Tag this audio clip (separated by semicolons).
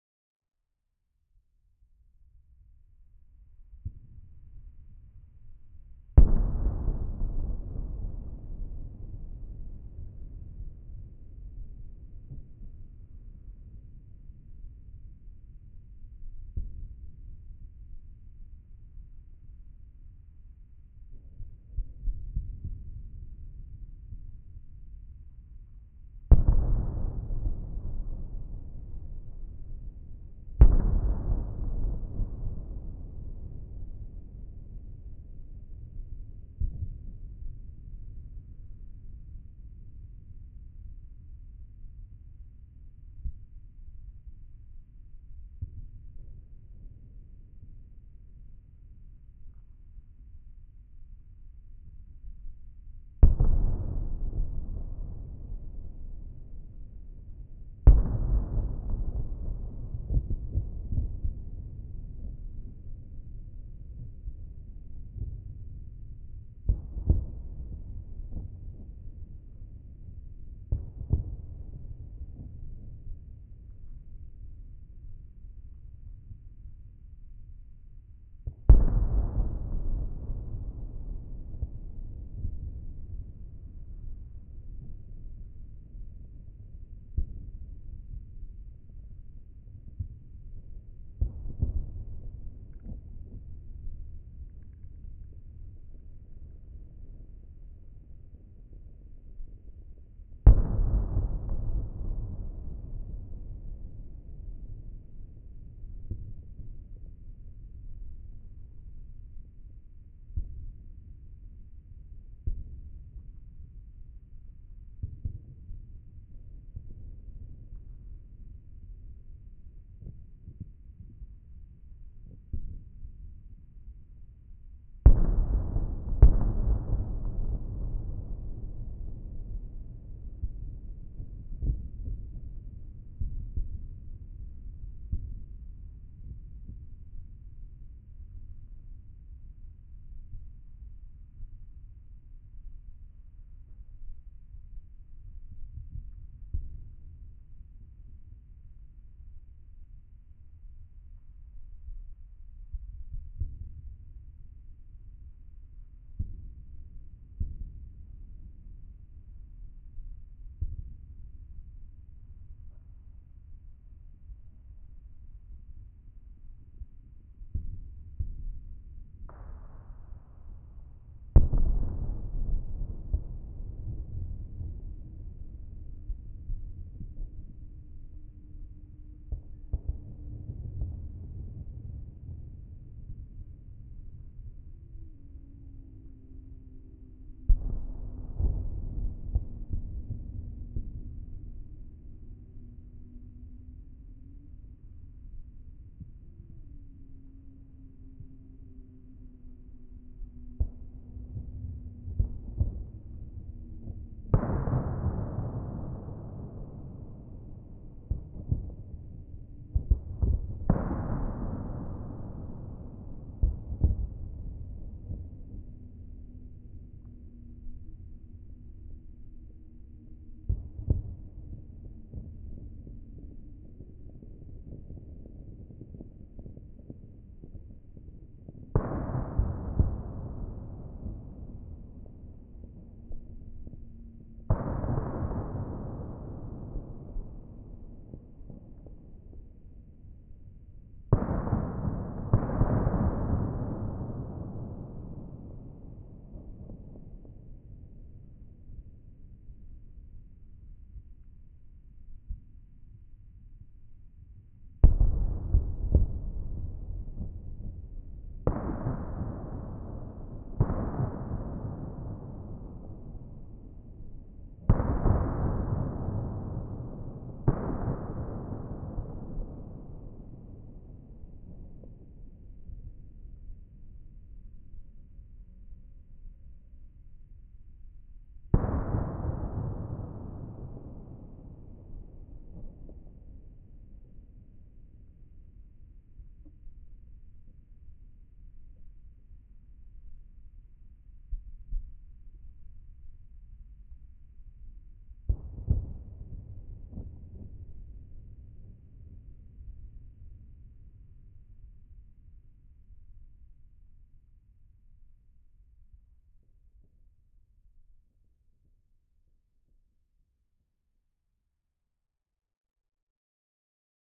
army; caliber; explosion; fire; shot